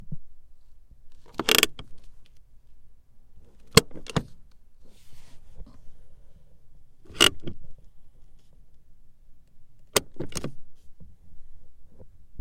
Car parking brake tighten and loosen
handbrake of a car - tighten and loosen
handbrake, loosen, brake, parking, vehicle, car, tighten